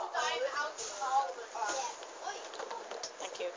bus doors opening
The sound of doors opening up on a bus, producing a hissing noise. Recorded with a ZTE-G N295/Orange Sydney mobile phone.
hiss, public-transport, field-recording, transport, door, bus, doors, opening